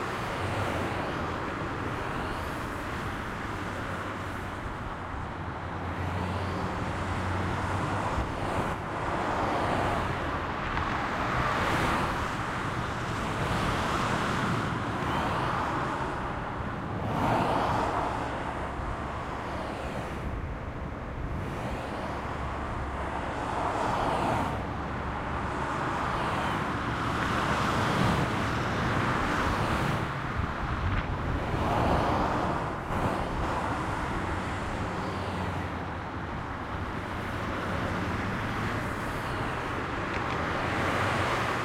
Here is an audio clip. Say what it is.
delphis AMBIENT MOTORWAY LOOP
recording on a bridge above the motorway. you loop this file to make it endless.
loop, car, ambient, motorway, h4, engine